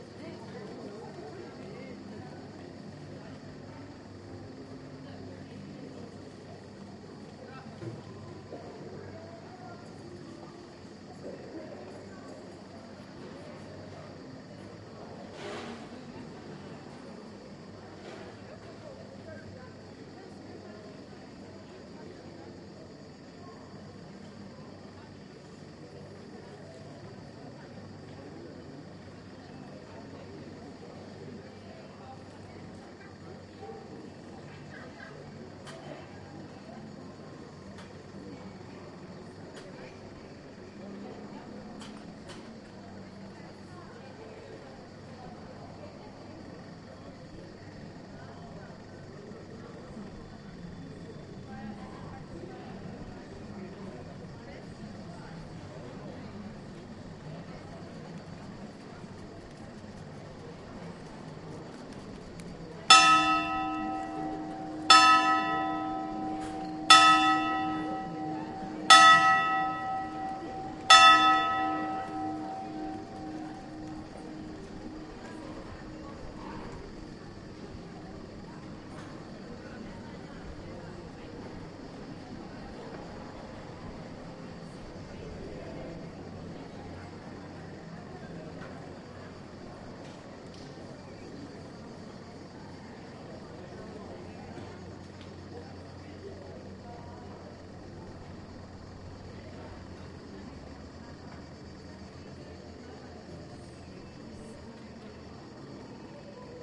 130720 Trogir MainSquare F 4824
Surround recording of the main square in the Croatian town of Trogir.
Ther recorder is situated before the front porch of the cathedral, facing the city hall.
It is 5 PM on a quiet summer day, some tourists and activity in the several cafés can be heard. The tower clock of the city hall strikes 5 in the middle of the recording.
Recorded with a Zoom H2.
This file contains the front channels, recorded with a dispersion of 90°